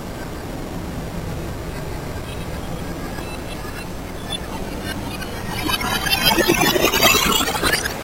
And then some other effects applied.